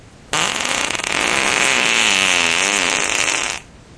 Morning fart
fart, poot